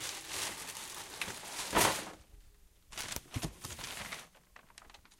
Rummaging through paper
rumble, rummage, clatter